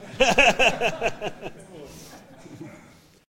Laughing male
recorded on camera Sennheiser wireless mic in front of male person
short, laugh, fun